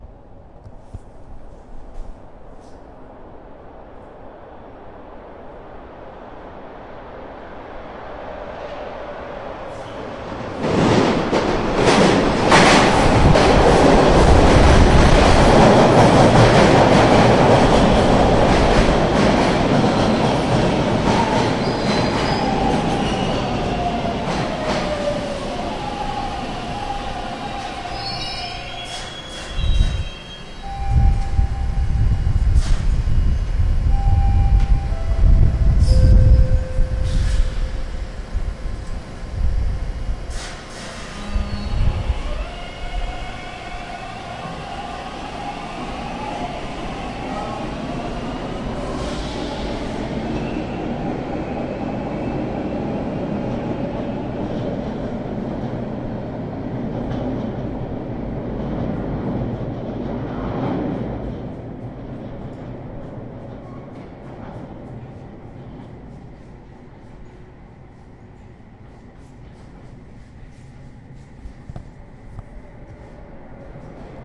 Subway station platform in Toronto 2008. Recorded with Zoom at end of platform where train enters station. York Mills station to be exact.
station, subway, platform